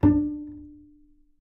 Double Bass - D4 - pizzicato
Part of the Good-sounds dataset of monophonic instrumental sounds.
instrument::double bass
note::D
octave::4
midi note::62
good-sounds-id::8746